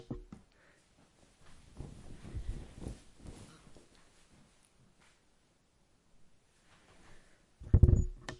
Throwing a towel around someone's shoulders. Internal, hard surface area.